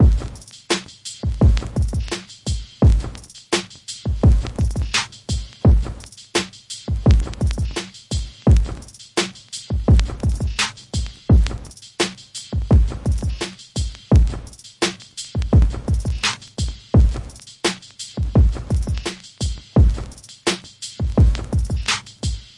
dr loop 1001024 085bpm
drums loop 85bpm
drums, loop, 85bpm